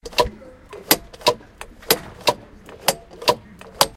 session 3 LBFR Mardoché & Melvin [7]
Here are the recordings after a hunting sounds made in all the school. Trying to find the source of the sound, the place where it was recorded...
rennes, labinquenais, sonicsnaps, france